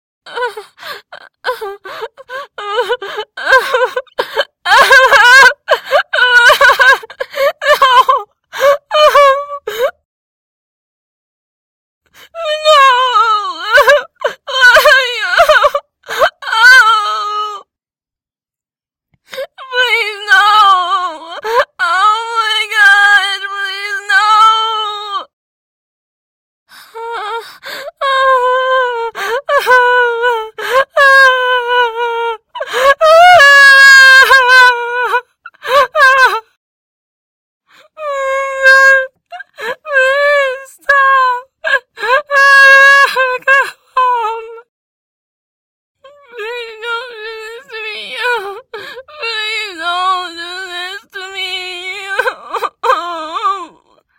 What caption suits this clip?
Girl / Woman Sad Crying Sobbing
A girl sobbing crying "No!" "Why!" "Please, no!" "Please don't do this to me!" "I want to go home!" etc...
Microphone: AT2020
Processing: None